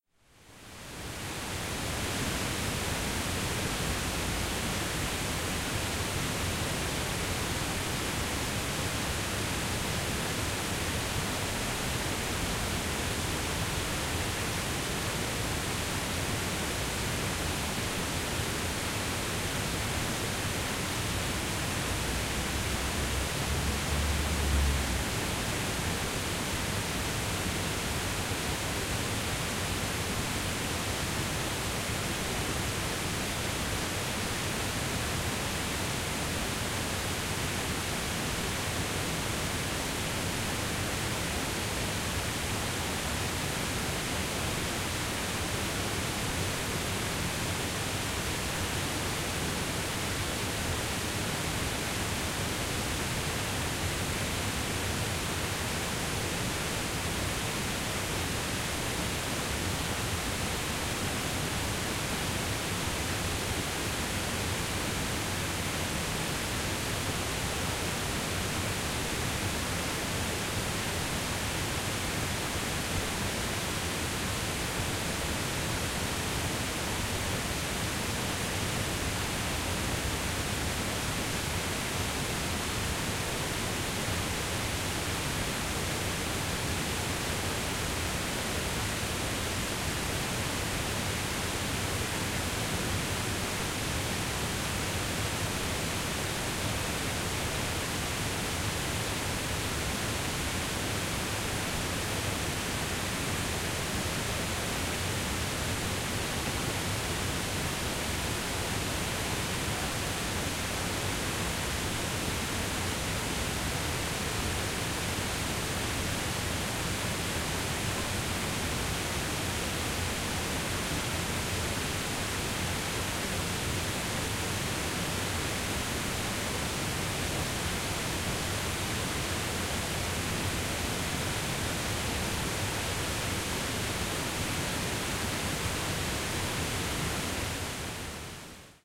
heather river 201211
20.12.11: about 7 p.m. sound of the Heather river (Wrzosowka). Recorded near of a little waterfall. Karkonoska st in Sobieszow (south-west Poland). recorder: zoom h4n. recorded from the ground level. fade in/out only